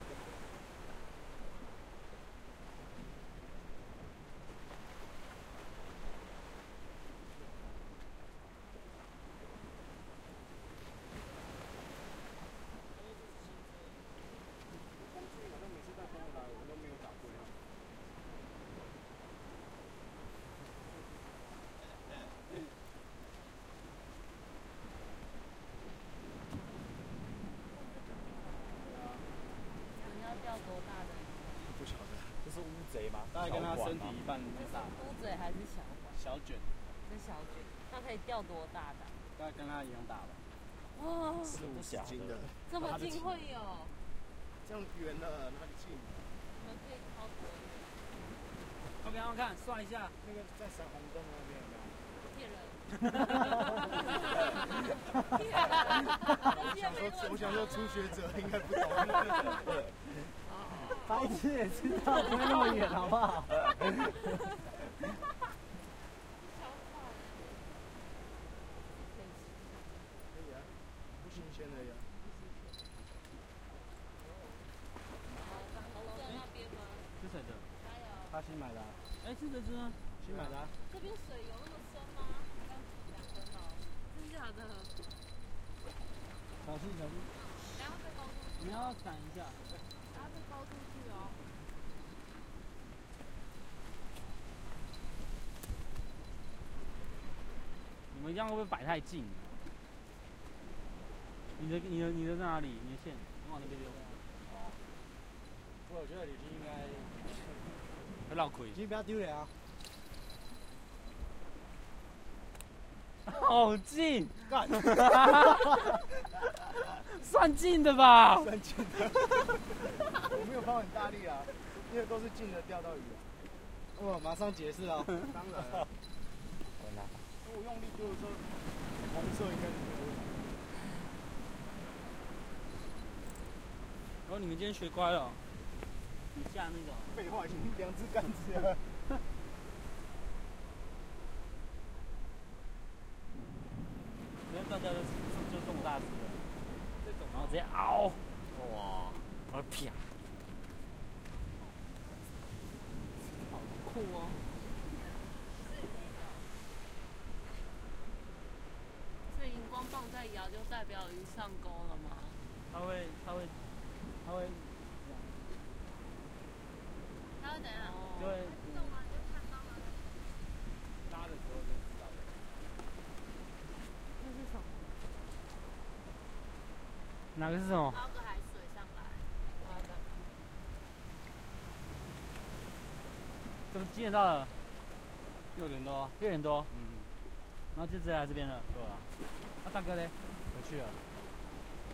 130809-Fishing in the night at HuaLian
use my H6 recorder. XY Stereo. In Taiwan's some nature way.
asia, travel, beach